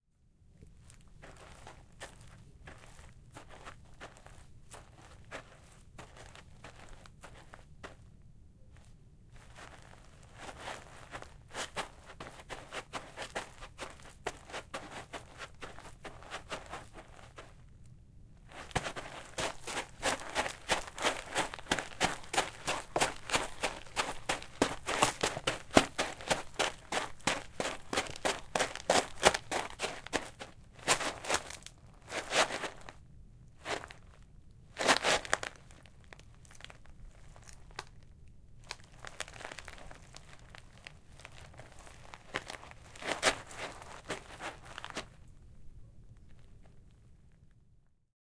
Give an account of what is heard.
Walking on gravel: walking, jogging, running on gravel. Sand and stone movement.
run, running